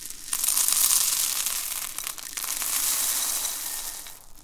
A sound of filling cereal into a bowl.
bowl, cereal, filling